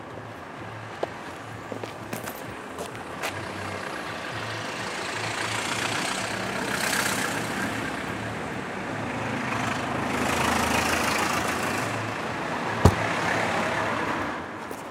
FX - vehiculos 2